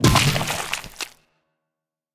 SZ Squish 09
A squishy, gory sound of a car or motorcycle hitting a zombie (or something else perhaps?).